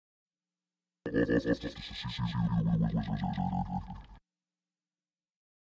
"off to see the wizard" randomly recorded during a music recording session, run through a pitch shifter and a delay and maybe a few other filters.
voicerecording, offtoseethewizard